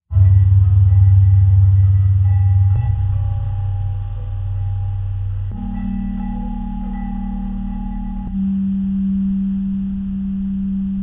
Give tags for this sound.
creepy; thrill; terror; sinister; suspense; spooky; deep; scary; horror; atmosphere; weird; dark; terrifying; ambient

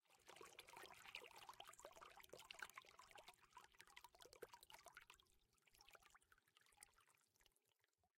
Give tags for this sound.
ambiance; running; Water

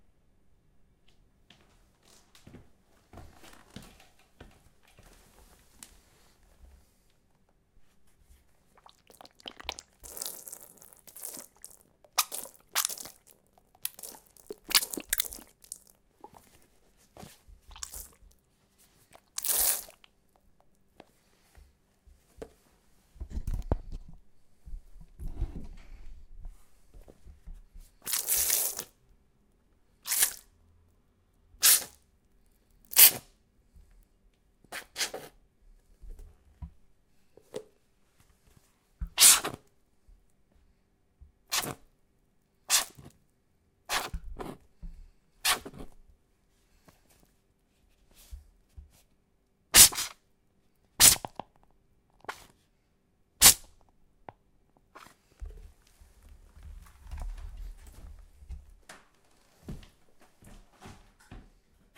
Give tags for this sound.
botlle; slpash; water; empty

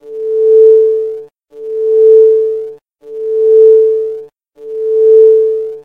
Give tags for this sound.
chimio; laser; medical; scanner

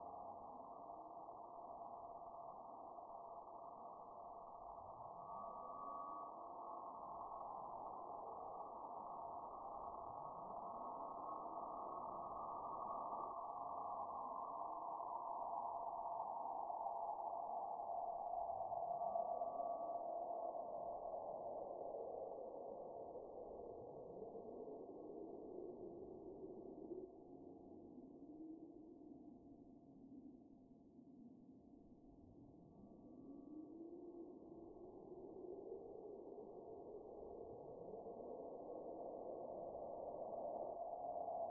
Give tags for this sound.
alien; ambient; artificial; atmosphere; dark; eerie; experimental; galaxy; noise; sci-fi; space; storm; strange; weird

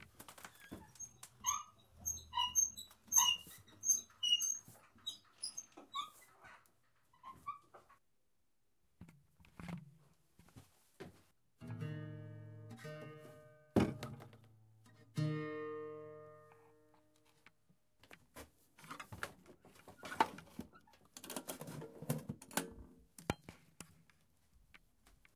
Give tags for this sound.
counterweight; door; guitar; metal; rustic; squeak

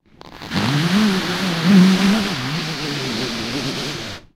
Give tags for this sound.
abseil; belay; climbing; climbing-rope; glove; hand; rappell; rope; stereo; xy

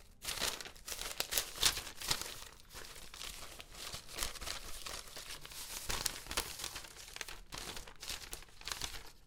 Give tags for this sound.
leaf; nature; passing-by

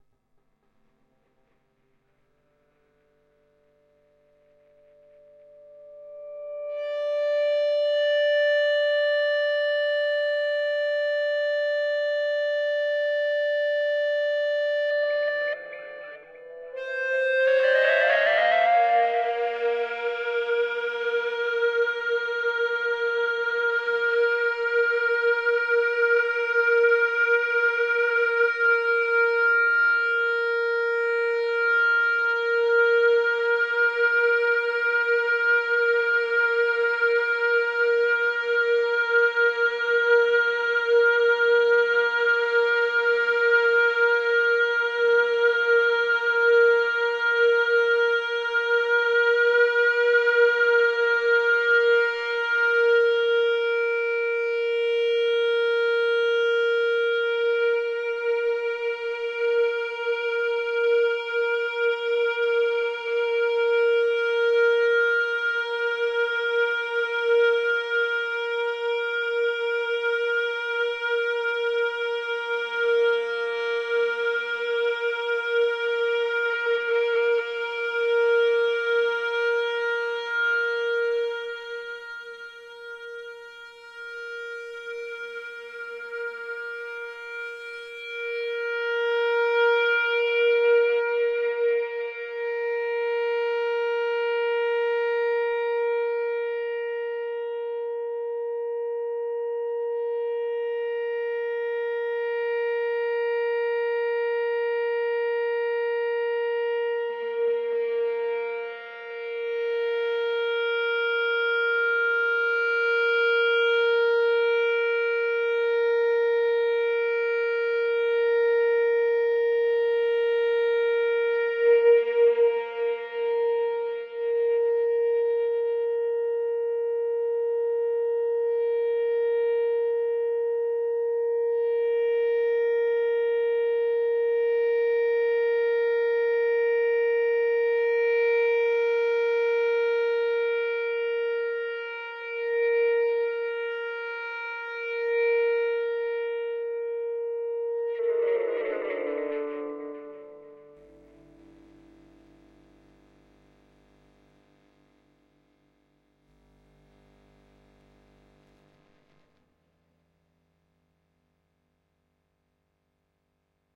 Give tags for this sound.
drone; EBow; guitar; sustained-note; G-minor

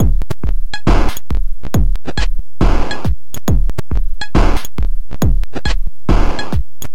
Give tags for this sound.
69bpm; beat; cheap; distortion; drum; drum-loop; drums; engineering; loop; machine; Monday; mxr; operator; percussion-loop; PO-12; pocket; rhythm; teenage

